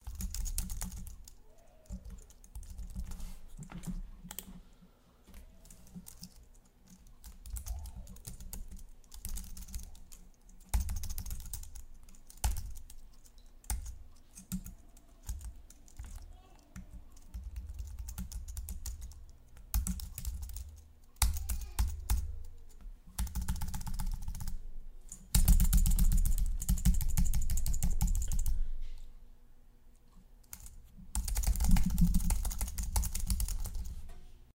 Typing On Keyboard
Typing on an Apple keyboard.
apple, buttons, clicking, computer, keyboard, keys, mouse, typing